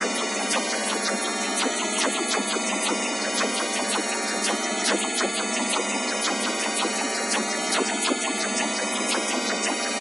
More melodic sequences and events created with graphs, charts, fractals and freehand drawings on an image synth. The file name describes the action.
loop, dance